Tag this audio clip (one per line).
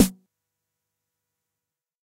909
drum
jomox
snare
xbase09